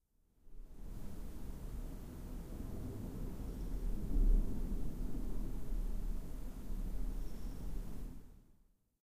One of the 14 thunder that were recorded one night during my sleep as I switched on my Edirol-R09 when I went to bed. This one is very far away. The other sound is the usual urban noise at night or early in the morning and the continuously pumping waterpumps in the pumping station next to my house.
bed, body, breath, field-recording, human, rain, thunder, thunderstorm